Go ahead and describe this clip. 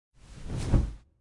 This could be used as blanket or pillow fluffing.